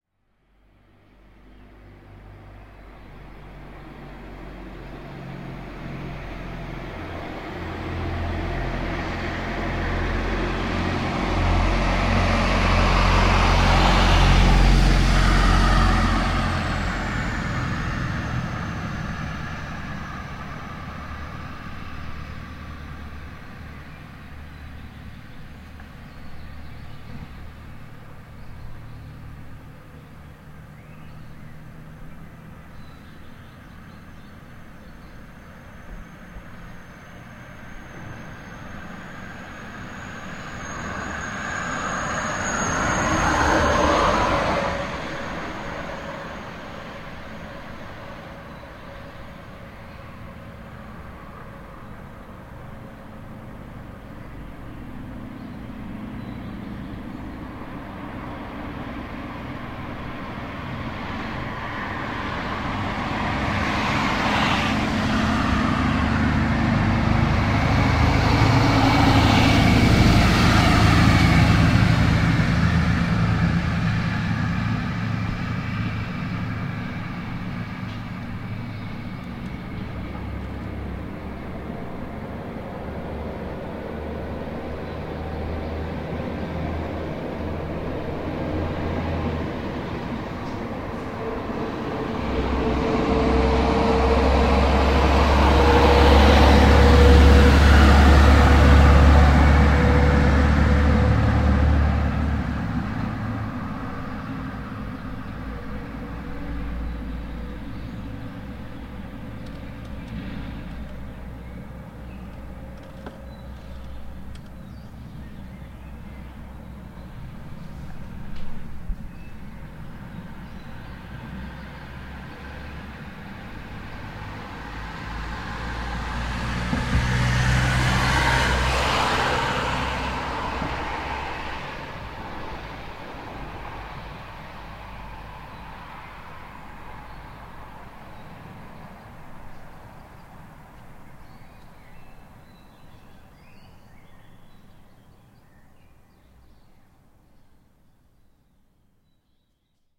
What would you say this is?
Several passing cars along the street.
outdoor, field-recording, dr-100, street, car, passing-cars, tascam